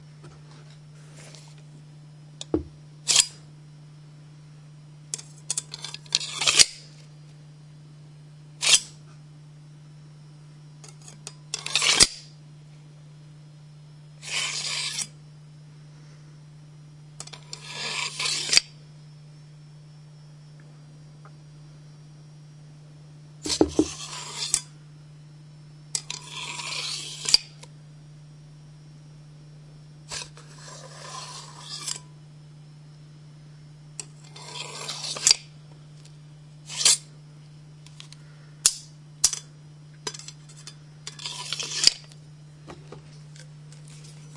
Drawing and sheathing of a different metal dagger.
Recorded with a Canon GL-2 internal microphone.
metal, dagger, unsheath, weapon, scrape, sheath, unprocessed, sword, knife